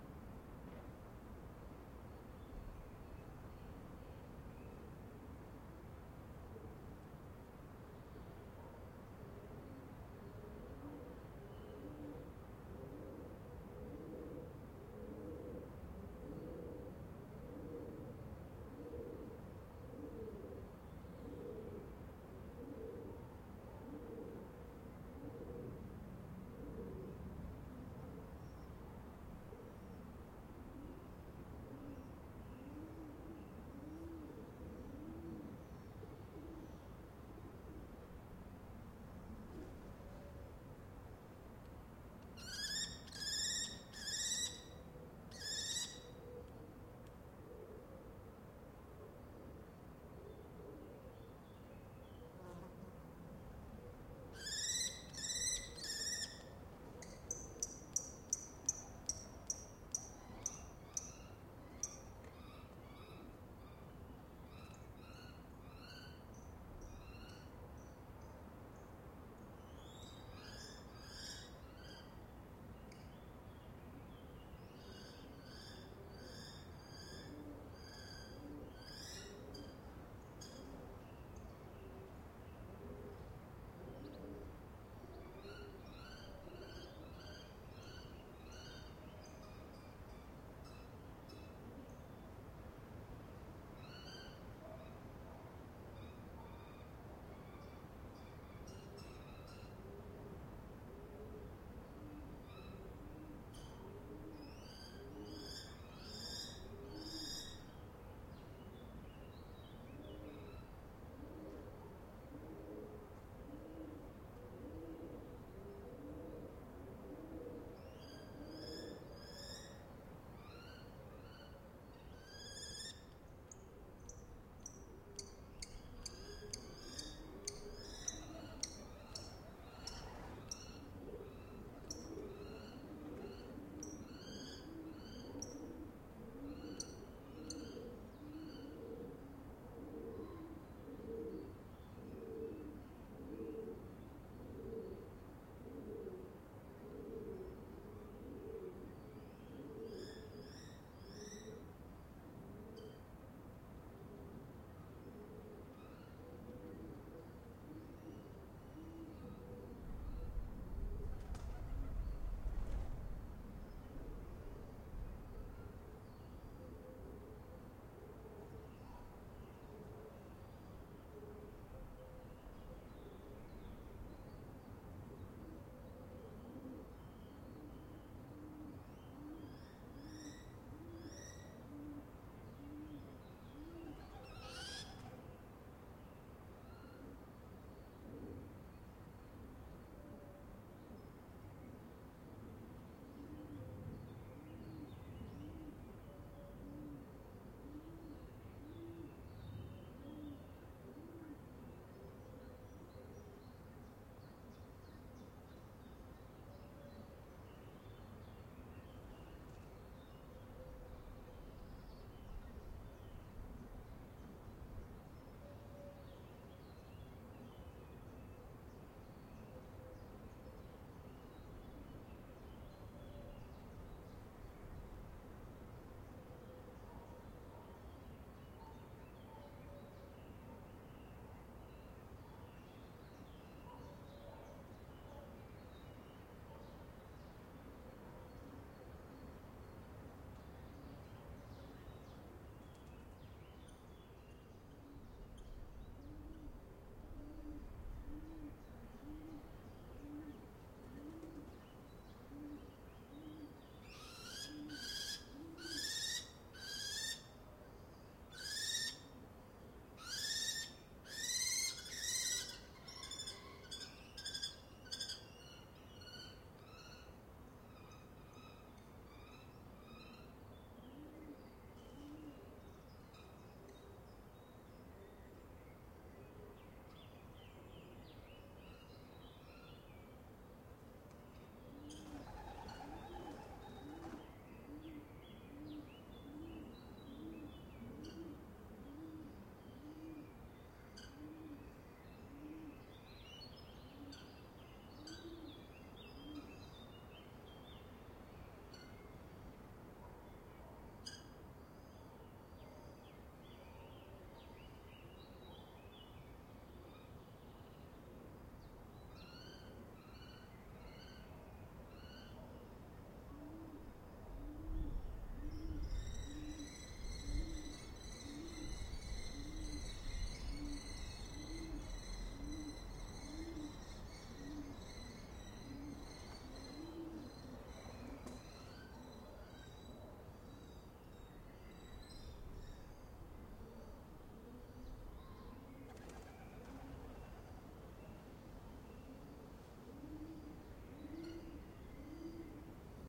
Monastery ruins 1(birds, pigeons, wings, wind)
Ambience of a ruined monastery. Lots of bird sounds.